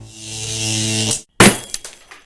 Compound edit to make a buzz...crack! Created for stage play requiring audible power failure cue.